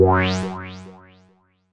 wah synth sound mad with Alsa Modular Synth
synth, wah